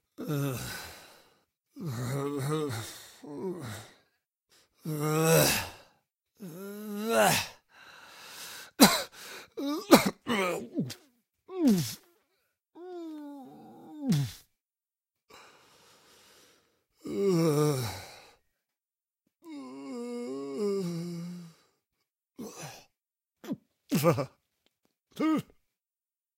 AS012949 disdain
voice of user AS012949
aversion, contempt, despisement, despite, despitefulness, disdain, disgust, human, loathing, male, man, repugnance, repulsion, revulsion, scorn, vocal, voice, wordless